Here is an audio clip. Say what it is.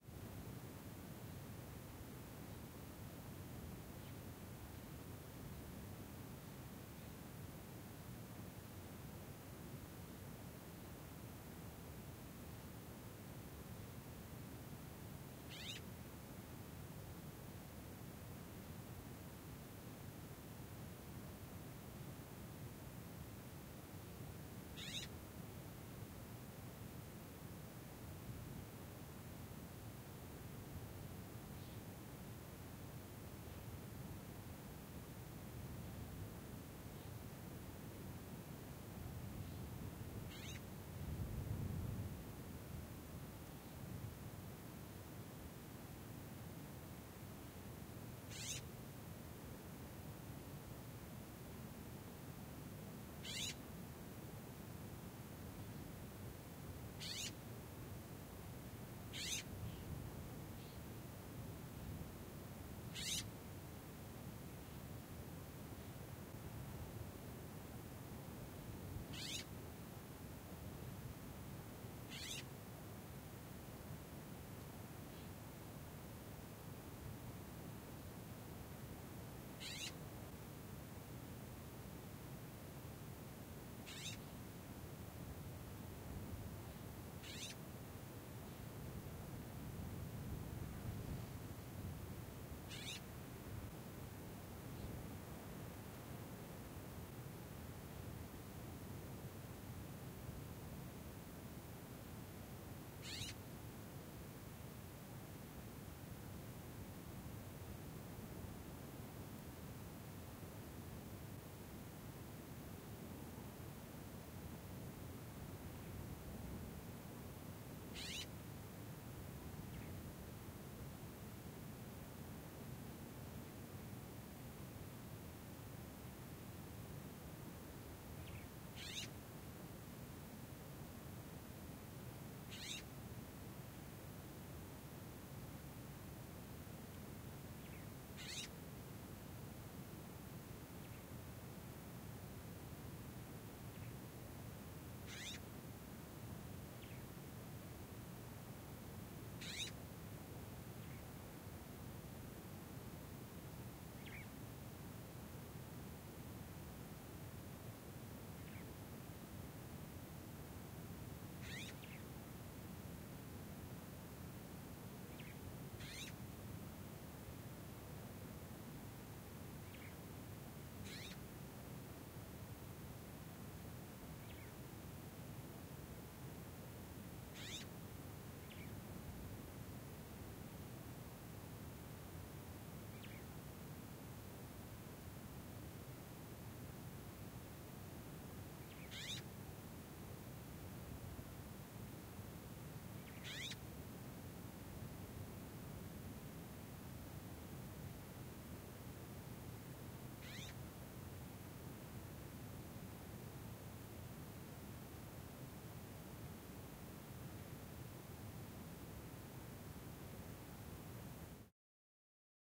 amb;ext;quietdesert;birdcall-response
Ambisonic FuMa b-format recording recorded with the Coresound Tetramic. Sandia Mountain fooothills, Albuquerque, New Mexico. Birds call/response. quiet desert. *NOTE: you will need to decode this b-format ambisonic file with a plug-in such as the (free)SurroundZone2 which allows you to decode the file to a surround, stereo, or mono format. Also note that these are FuMa bformat files (and opposed to Ambix bformat).
ambience, ambisonic, atmosphere, background, b-format, birds, desert